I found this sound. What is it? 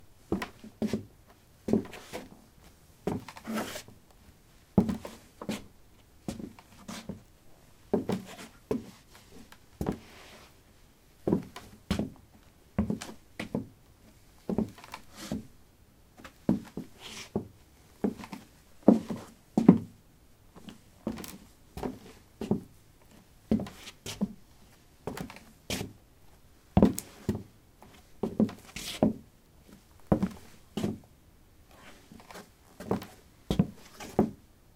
wood 16b trekkingshoes shuffle
Shuffling on a wooden floor: trekking shoes. Recorded with a ZOOM H2 in a basement of a house: a large wooden table placed on a carpet over concrete. Normalized with Audacity.
footsteps, steps